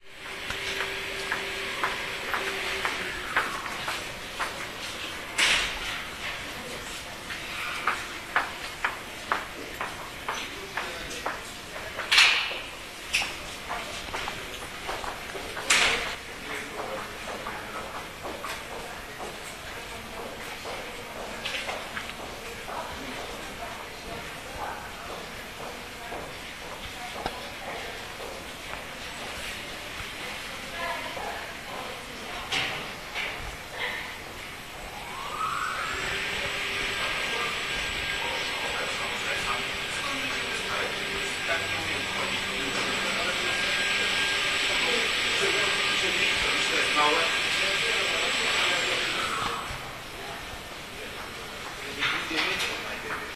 18.12.2010: about 12.30. The School of Humanistics and Journalism on Kutrzeby St in Poznan. the first floor, the passage over the porter's lodge. voices, steps and sounds of the lift.